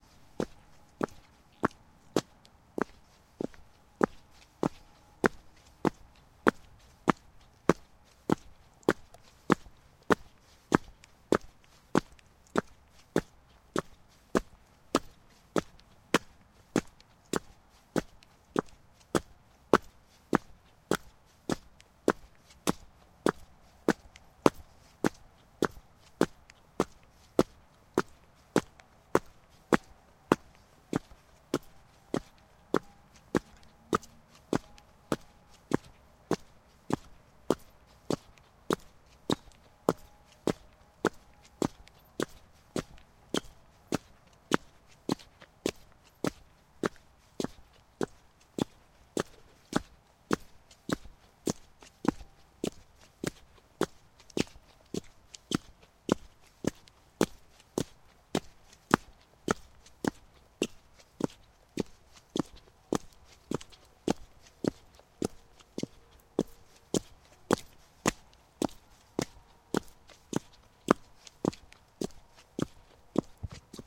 stamping on the street
stamping in lockstep on the street including some squeaky noise from the shoes